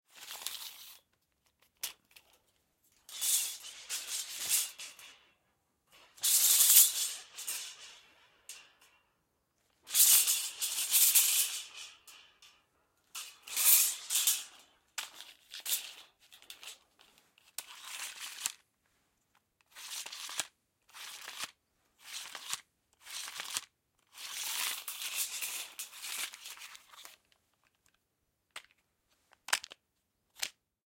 Recoreded with Zoom H6 XY Mic. Edited in Pro Tools.
Playing around with a ruler, shaking pulling it in and out.
sharp, metallic, noise, strange, ruler